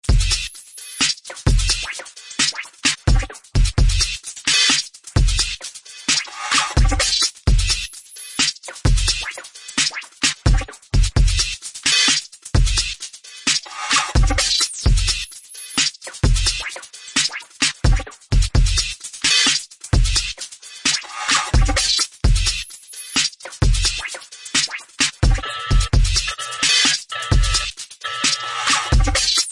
Chopped up a Splice loop, added additional one-shots & tons of resampling, adding fx, then more resampling again. A study of construction, deconstruction, and reconstruction; rinse & repeat.